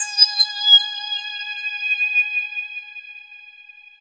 THE REAL VIRUS 10 - RESONANCE - G#5
High resonances with some nice extra frequencies appearing in the higher registers. All done on my Virus TI. Sequencing done within Cubase 5, audio editing within Wavelab 6.
lead, resonance, multisample